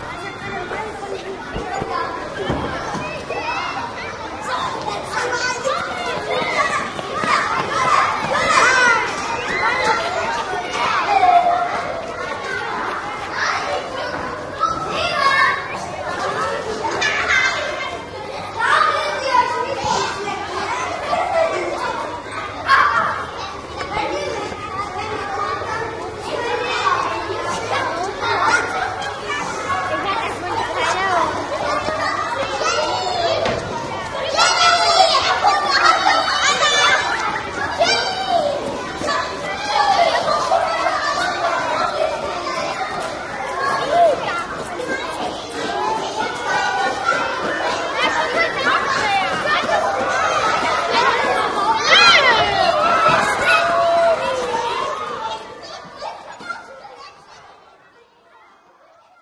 children on a schoolyard in Germany playing
germany, kids